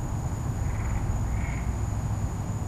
The frogs and insects at night recorded with Olympus DS-40 with Sony ECMDS70P.